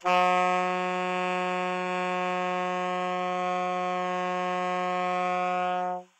Alto Sax F#3
The F#3 note played on an alto sax
woodwind, music, saxophone, alto-sax, sax, jazz, instrument